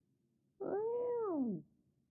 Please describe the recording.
Sound of a banging meow.
Distress, Loud, noise